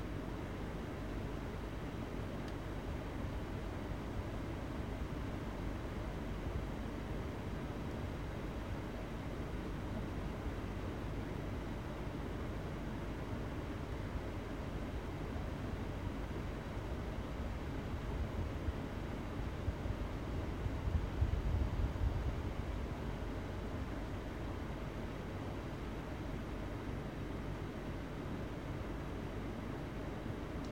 air conditioner 2
air conditioner, room tone, aire acondicionado
acondicionado, appliances